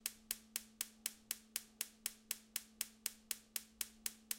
004 House StoveSpark
Sound of the spark before turn it on